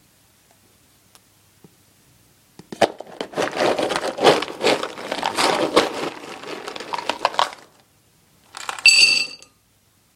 dog food in dish
Getting some dog food from a box full of the stuff and then pouring it into a ceramic dish.